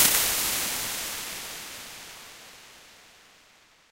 funnel hall test huge
Artificial impulse responses created with Voxengo Impulse Modeler. I made a long hallway, slightly funnel shaped. It was HUGE!
impulse, response, reverb, convolution, ir